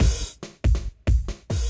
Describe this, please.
140 break beat drum loop 3
140 bpm break beat drum loop
bpm, break-beat, 140, dubstep, drum-loop